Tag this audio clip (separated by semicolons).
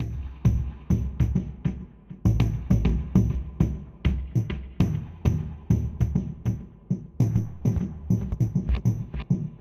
works-in-most-major-daws; 100bpm; MrJkicKZ; Bass-Drum; groove; Loop; 4-4; MrJimX; Drums; MrJworks; Urban-Forest